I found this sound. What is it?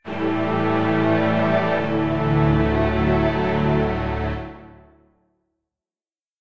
Success Resolution Video Game Sound Effect Strings
Thank you and enjoy!
calm, game, happy, positive, resolution, strings, success, triumph, victory